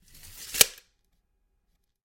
Stapler Hands 05
Collection of sounds from a stapler. Some could be used as gun handling sounds. Recorded by a MXL V67 through a MOTU 828 mkII to Reaper.
cock, staple, clip, hit, slide, click, tick, stapler, thud, gun